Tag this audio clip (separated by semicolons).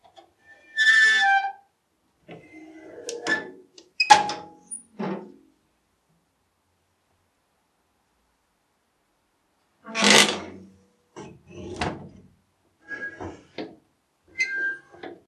basement; cellar; creak; door; hatch; jar; metal; old; ruin; small; squeak; window